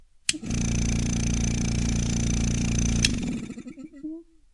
air compressor on off short
Binks diaphragm compressor, 60 hz, used for an air brush. Turned on, ran for 2 seconds, turned off.